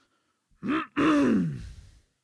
Pigarro Bravo
Vocais, Pigarro, Bravo